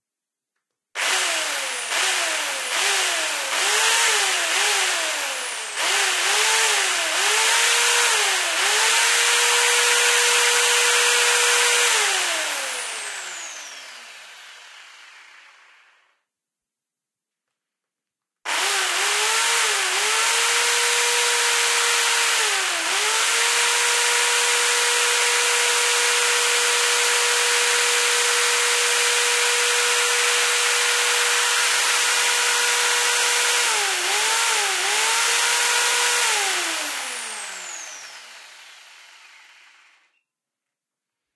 20061025.circular.saw
noise of an electric Black&Decker circular saw cutting nothing (but the air) / ruido de una sierra circular